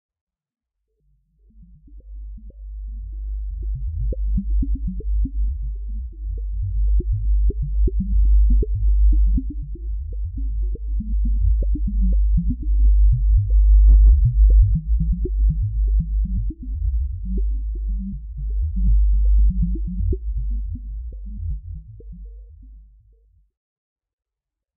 Heavily processed VST synth sounds using various filters, delays, flangers and reverb.
Blips, Sub, Trippy, VST, VSTi, Water